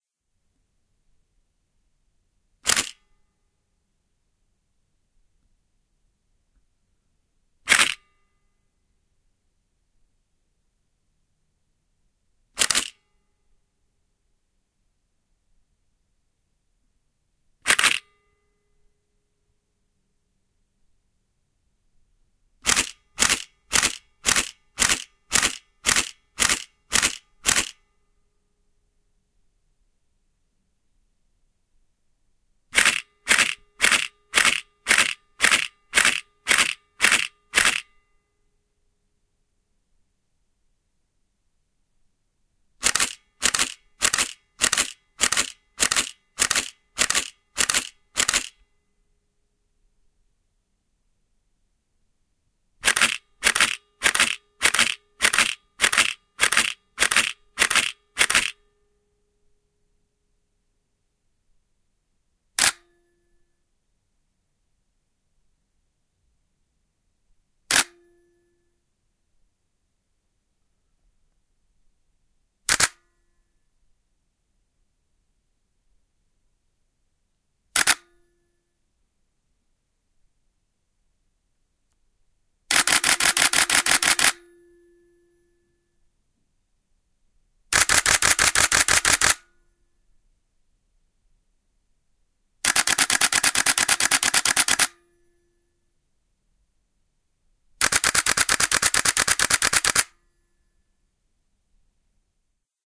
Nikon shutter D80 & D7100
from 0:00 to 1:00 = Nikon shutter D80 /
from 1:00 to end = Nikon shutter D7100
D7100, D80, nikon, photo, shutter, sound